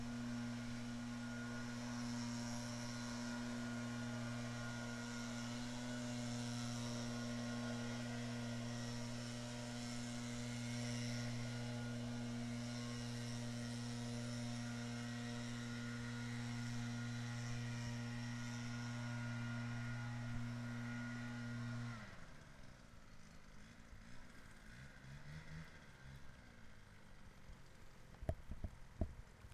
Leaf sond 2
garden, motor, ambiance, blower, electrical, general-noise